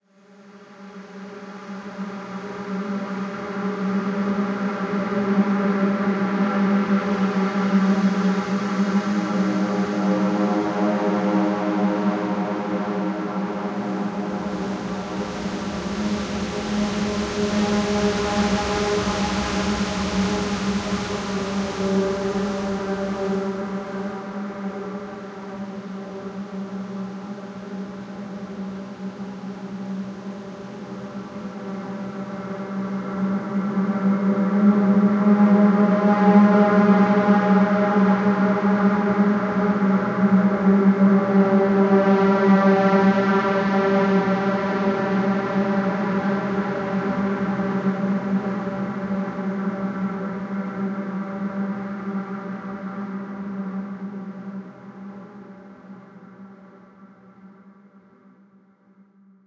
Future Garage Ambient Textures 07
Future Garage (Ambient Textures)Opening/Ending
ambient, cinematic, dark, ending, future, garage, opening, textures